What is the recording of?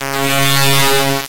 A harsh digital frequency sweep.
harsh, sweep